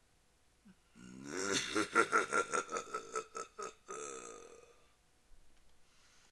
evil laugh-21
After making them ash up with Analogchill's Scream file i got bored and made this small pack of evil laughs.
solo, cackle, evil, single